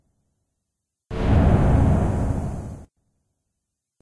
Mysterious Scary Moment
This special sound I created while using "RICHERlandTV's" sound and I normalized a small part of the very end then faded in and out. I hope you guys enjoy.
Here is the link to the orignal:
Alien, Changed, Creepy, Edit, Fade-In, Fade-Out, Horror, Mysterious, Normalized, RICHERlandTV, Scary, Science-Fiction, Sci-Fi, Spooky, Strange